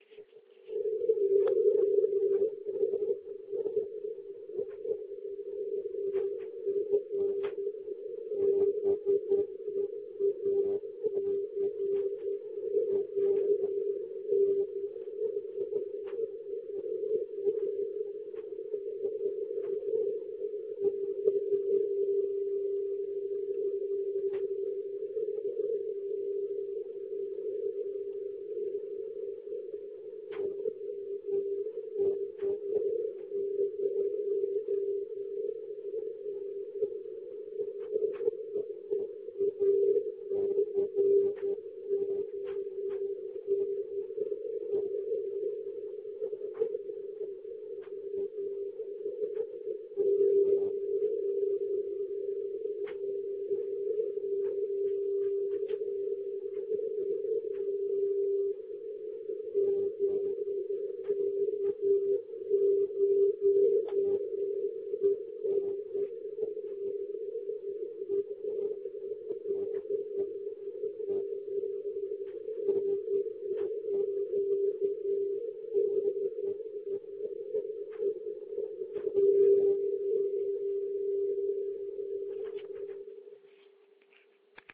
OK0EN-BCN-20120831-1840UTC-3600.02KHZ
Czech radio beacon
morse, beacon, cw